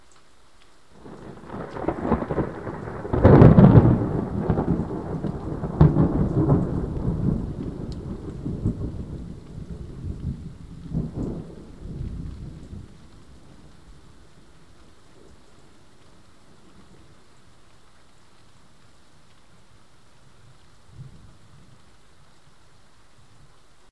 Huge thunder was recorded on 31st of July, morning in a thunderstorm in Pécel, Hungary. The file was recorded by my MP3 player.
field-recording, lightning, rainstorm, storm, thunder, thunderstorm, weather